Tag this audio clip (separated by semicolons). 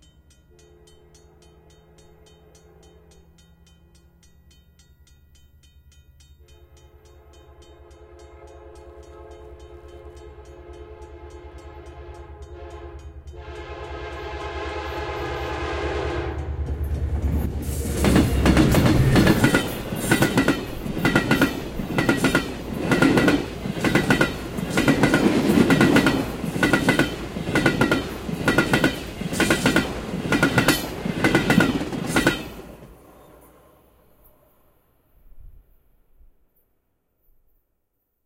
crossing; environmental-sounds-research; field; industrial; rail; train